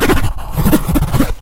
scribbling on a piece of cardboard with a pencil
cardboard, pencil, scribble